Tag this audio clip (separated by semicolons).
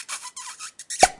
kiss; cartoon-kiss